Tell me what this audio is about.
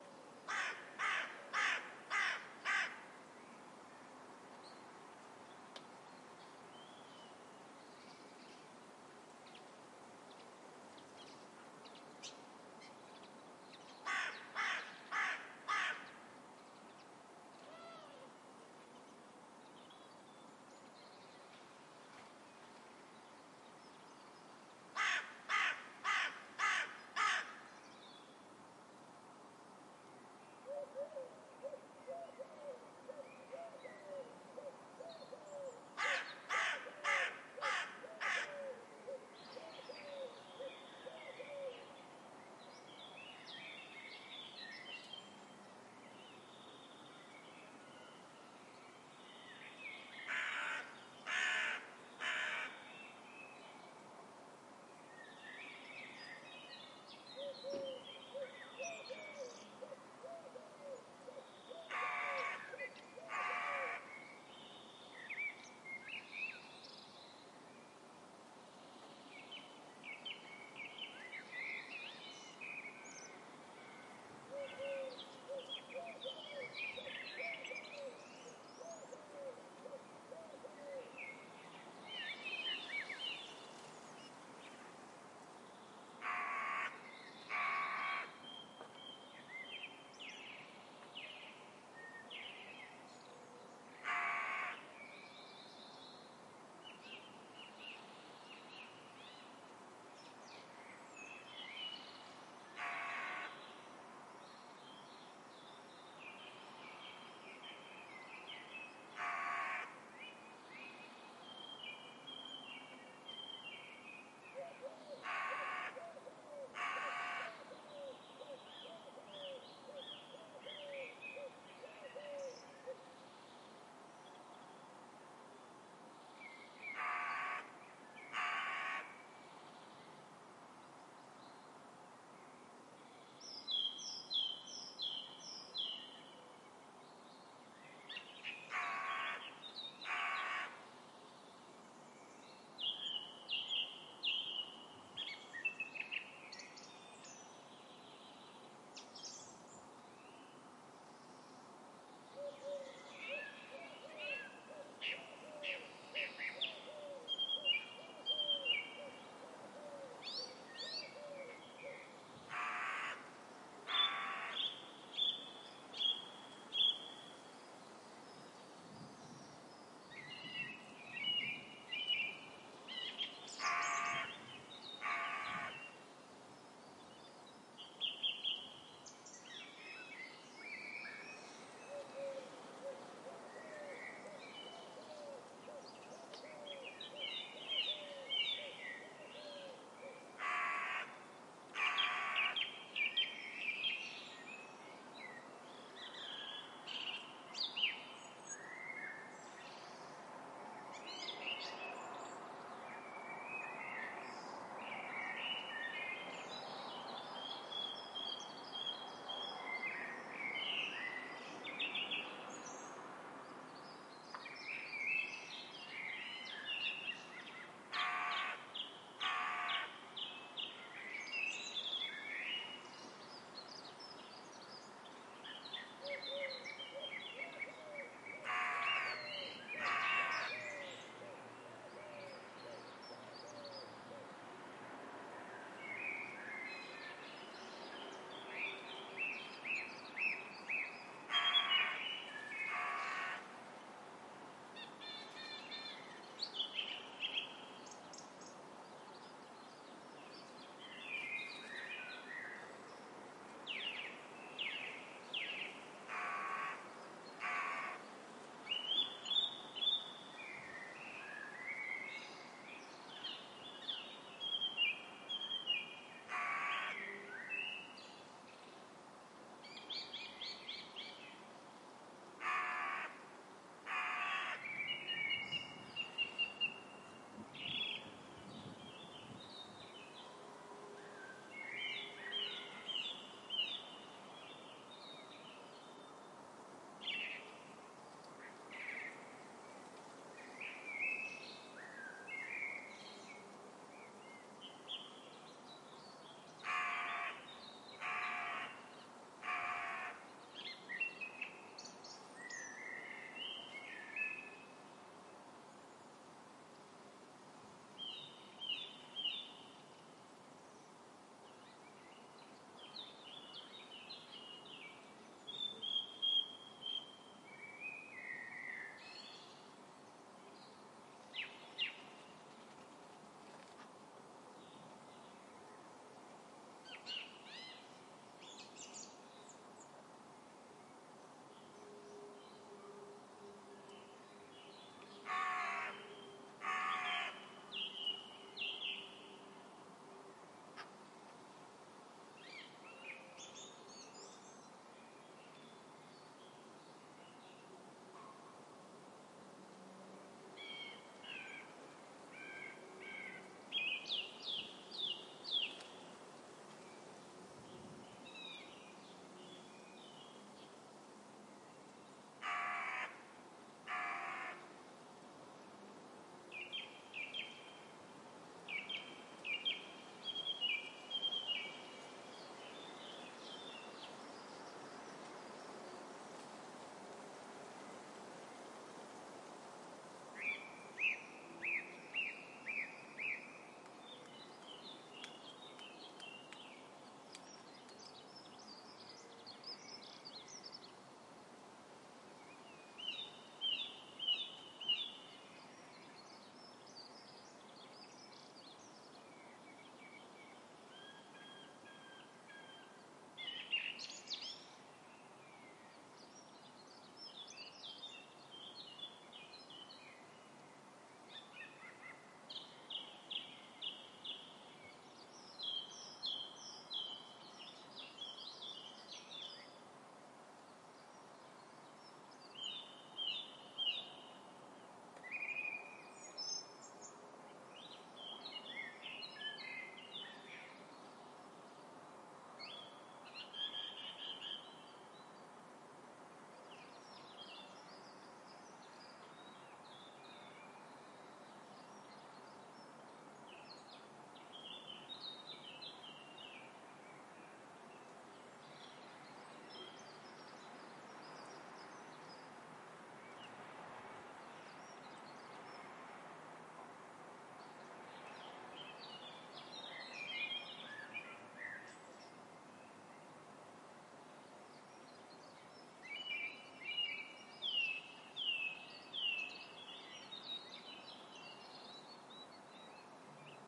Quiet neighborhood at dusk in Caen (France), and carrion crow (corvus corrone - corneille noire).
Sony PCM D100.
12 of may 2019, 9pm;
caen, carrion-crow, City, crow, dusk, france, neighborhood